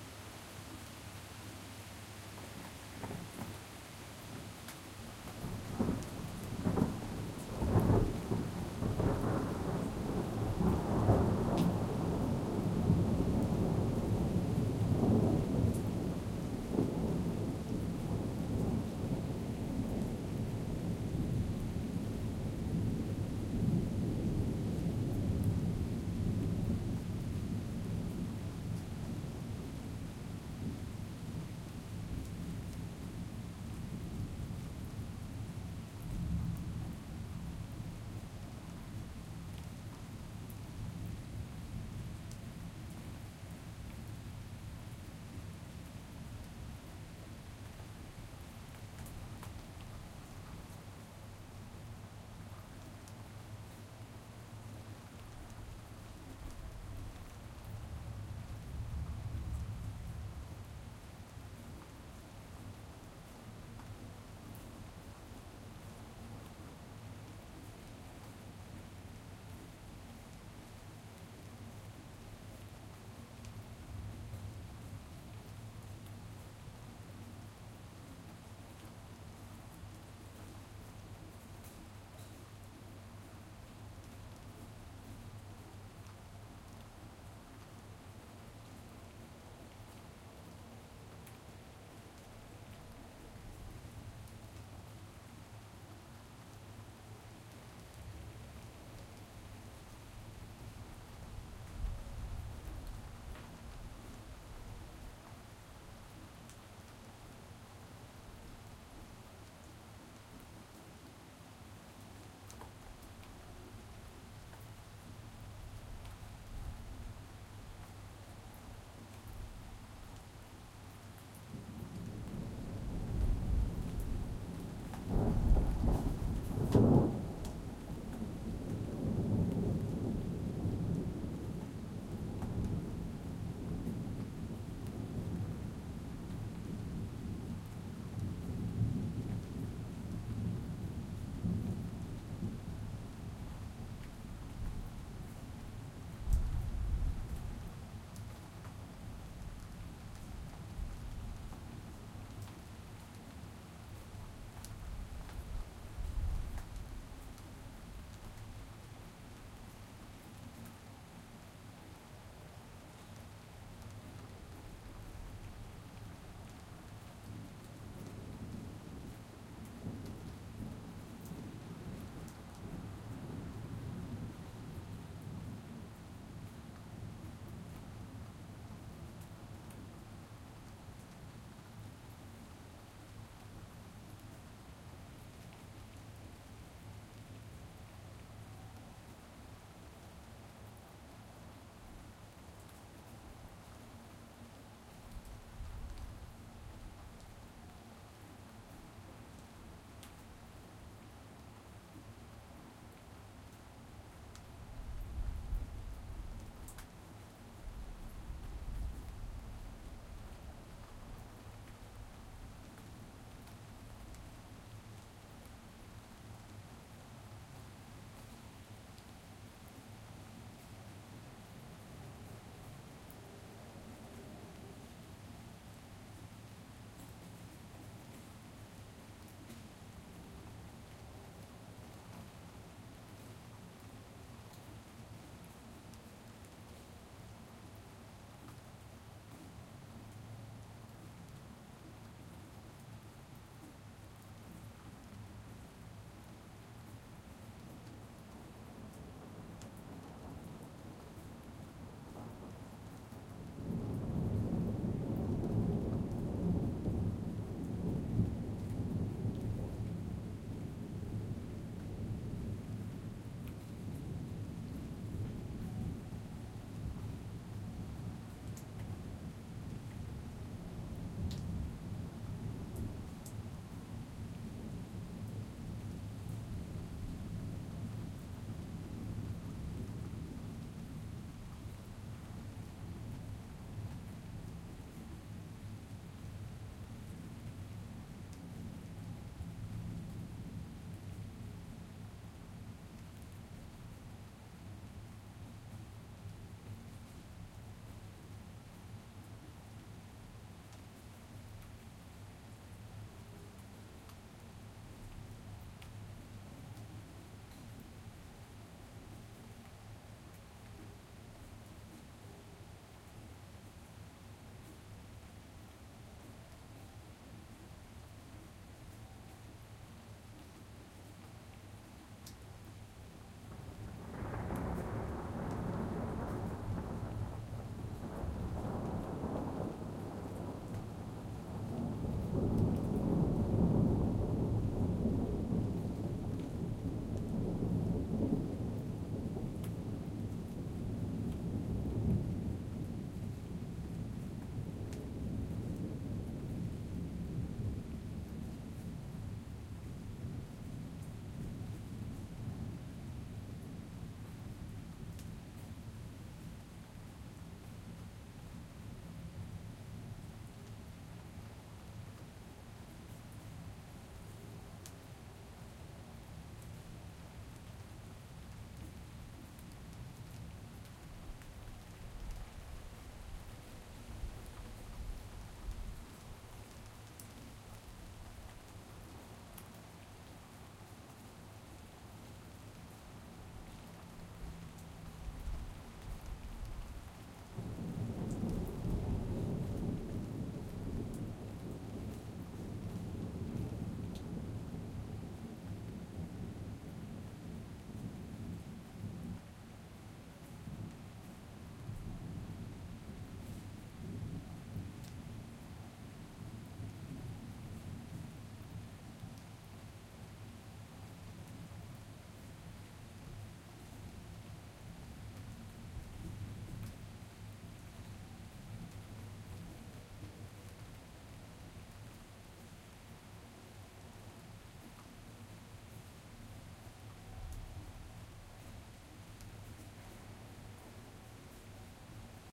Summer Rain /w Thunder
thunder, summer-rain, drizzle